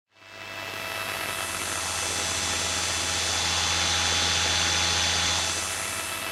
Radio Mid + HiEnd Noise
some "natural" and due to hardware used radio interferences
interferences, radio